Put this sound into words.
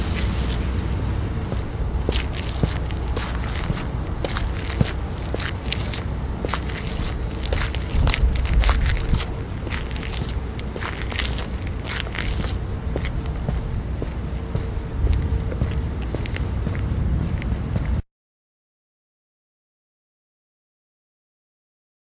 foot steps on cement with dirt